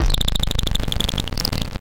Mute Synth Clicking 004
Some digital clicking sounds.
Please see other samples in the pack for more about the Mute Synth.
click, digital, electronic, mute-synth, noise-maker, rough, square-wave